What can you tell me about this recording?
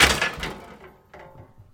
Impact-Misc Tools-0001
This was taken from hitting a group of wall mounted tools in my garage.